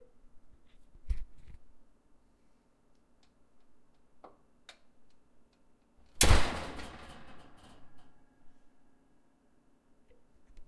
screen door slam
A stereo recording of my screen door being closed slightly harder than usual. As for credit, no need, enjoy.